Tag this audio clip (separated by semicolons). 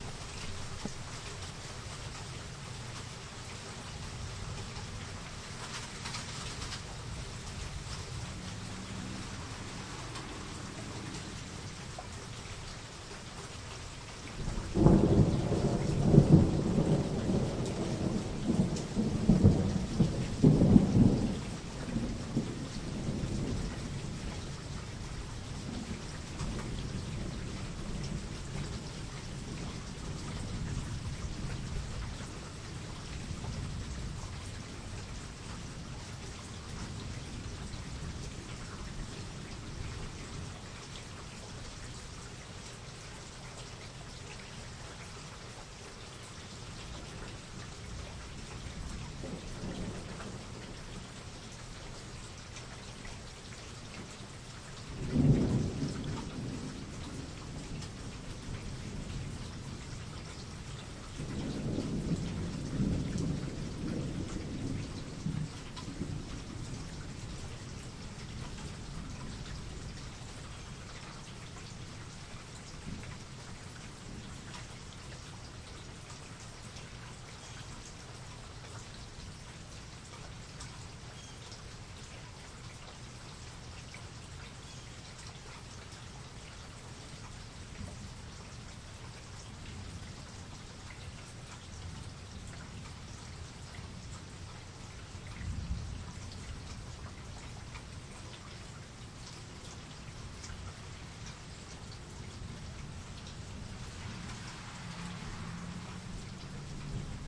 Rain; Storm; Thunderstorm; Weather